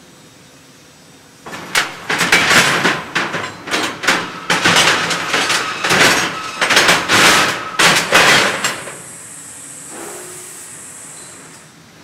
Make these metal pieces move
field-recording, machinery, metal-movements, factory, industry